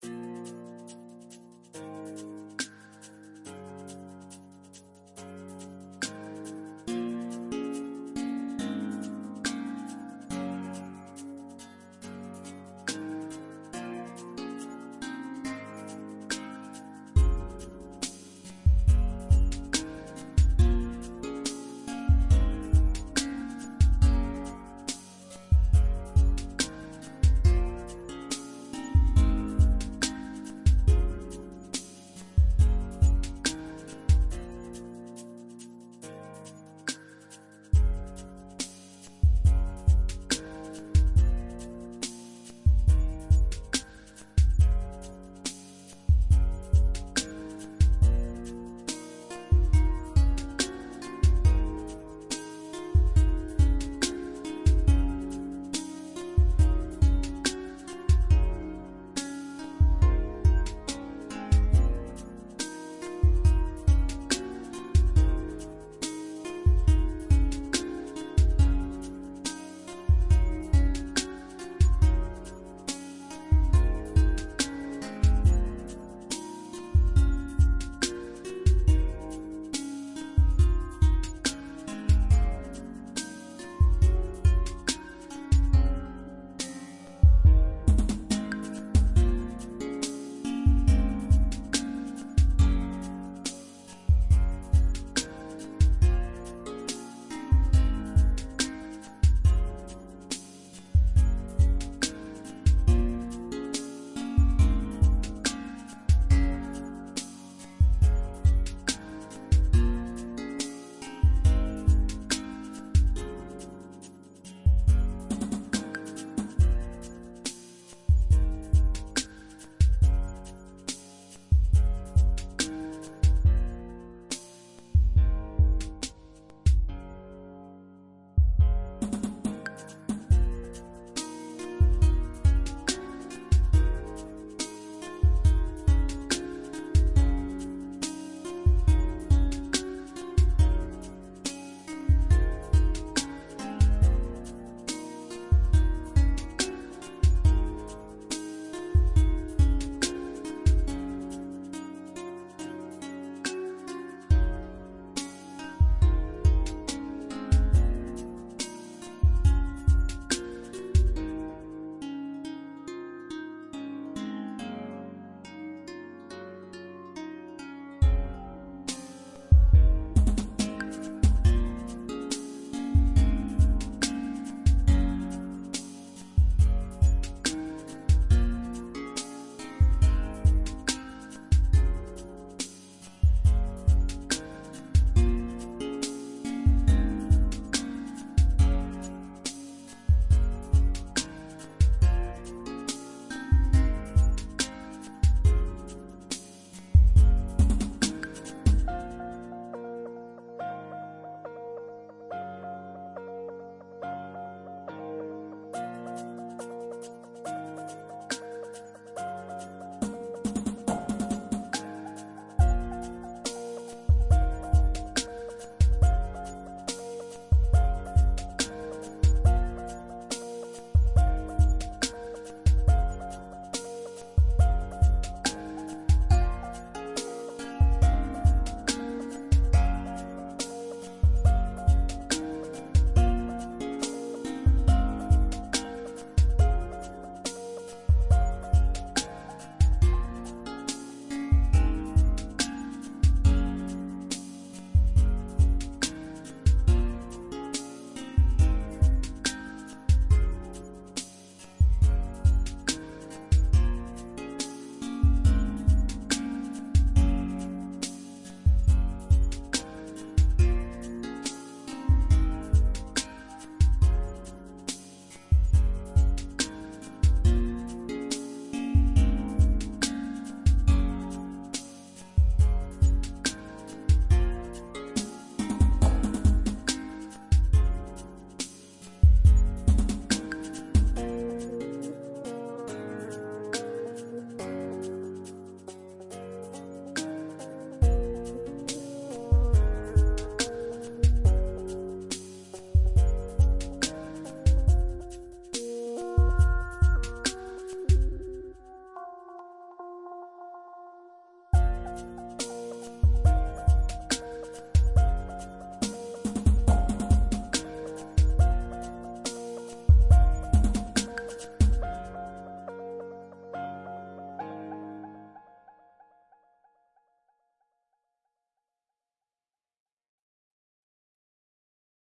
Making Up (soft Hip Hop)
Background music for an emotional moment. Made in FL Studio. Would work well in a cinematic piece.
ambient, background-music, cinematic, cry, dramatic, emotional, film, hip-hop, jazz, lonely, movie, music, piano, sad, short, soft, soul, soulful